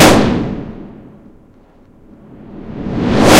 gunshot and reverse
echo, gunshot, reversal